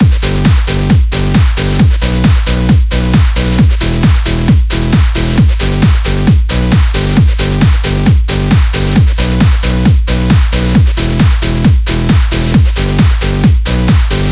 A full loop with video game sounding synths with modern sounding dance music. Perfect for happier exciting games. THANKS!